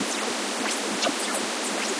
A graphical chart space noise made with either coagula or the other freeware image synth I have.
noise, space, synth, image